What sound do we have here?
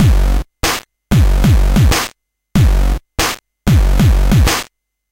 Atari ST Beat 04
Beats recorded from the Atari ST
Atari, Drum